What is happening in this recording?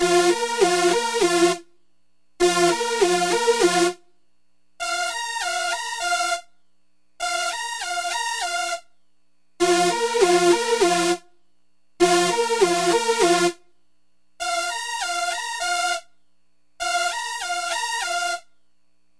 synth loop 1 100bpm CPK
Alternating octave stabs on old school synth
synth, techno